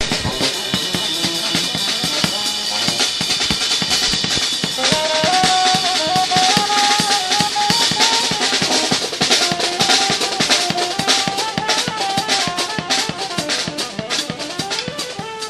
nyc washjazzsnip mono
Monophonic snippet of ambiance recorded in Washington Square in Manhattan while a saxophonist and a drummer improvise and the fountain hosts strange modern art performers recorded with DS-40 and edited in Wavosaur.
field-recording, fountain, jazz, monophonic, new-york-city, washington-square